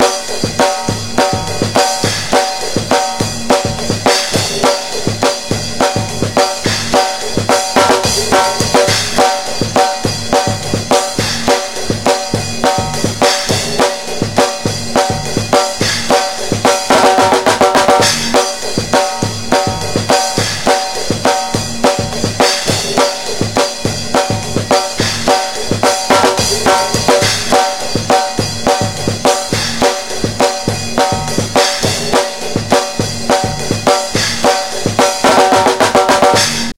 This was a part of one of my bands songs that i turned into a loop. so it's all live.
I'm the drummer naturally lol